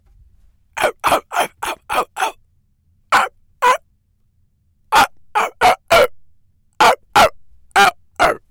dog barking
A dog barks when imitated.
Recorded at ideaMILL at the Millennium Library in Winnipeg, on March 8th, 2020.